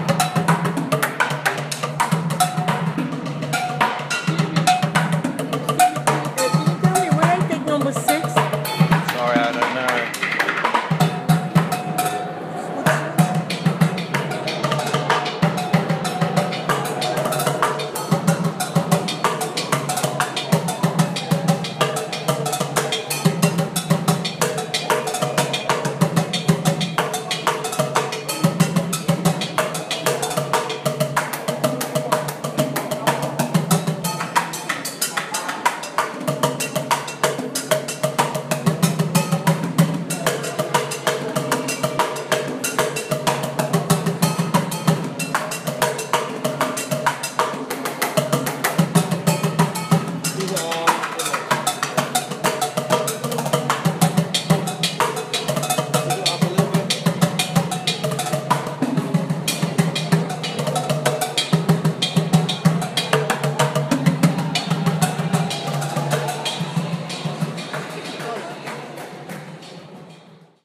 14th Street Bucket Subway Percussion
A bucket percussionist in 14th st subway station.